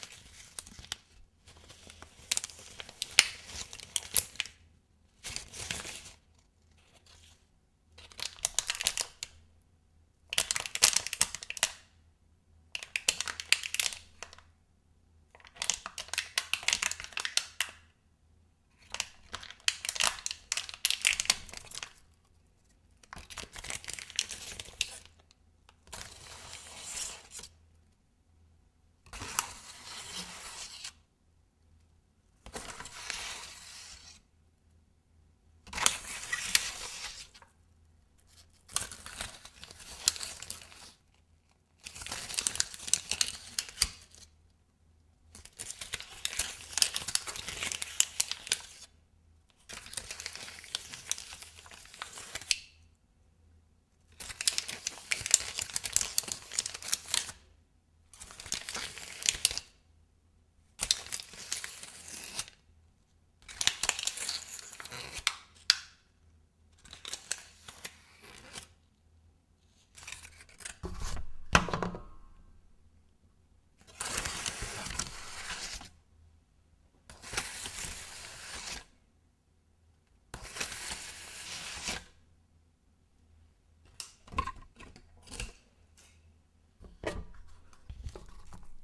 Plastic wrapping being dragged over a wooden board. There are at least
ten movements after each other, faster and slower. Recorded with a Mono
directional microphone. Not processed.